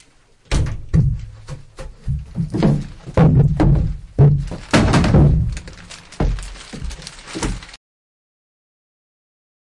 Large plastic garbage can, with plastic bag, percussive.